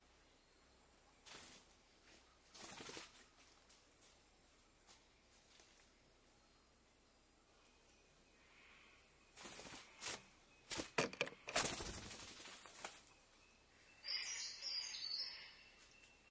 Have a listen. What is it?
Riflebird Flapping 2
Riflebirds eat grapes placed on the table on which the microphones were sitting. Fly in and fly out. Audio Technica AT3032 stereo microphone pair - Sound Devices MixPre - Edirol R09HR digital recorder.
flapping, rainforest